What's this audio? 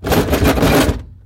Metal door rattle sound effect I made for a video game I developed.